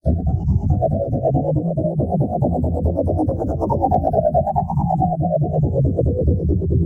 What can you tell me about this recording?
Weird Undulating Sub-Bass
Odd noise I created with a few VST's and a ton of effects in FL Studio 10.
alien, bass, cinematic, dubstep, fiction, noise, odd, scary, science, sci-fi